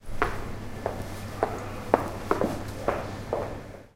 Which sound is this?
A man wearing boots passing by.